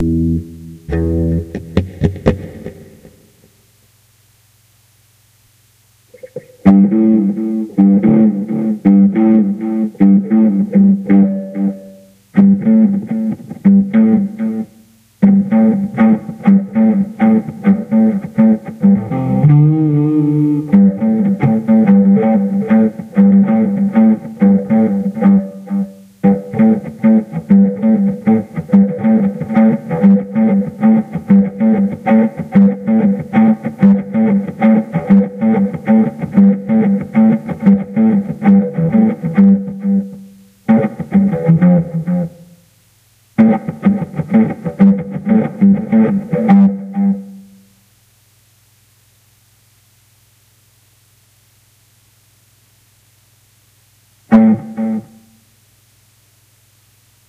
Testing the DS-40 in various USB class settings to determine if the unit can work as a cheap USB interface. I have the guitar and gear volume settings as low as possible to keep it from clipping but it's seriously limiting the tone and dynamics.